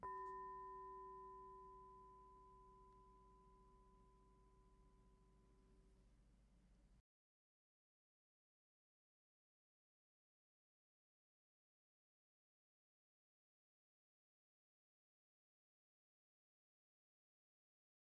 Heatsink Large - 05 - Audio - Audio 05
Various samples of a large and small heatsink being hit. Some computer noise and appended silences (due to a batch export).
hit, ring, heatsink, bell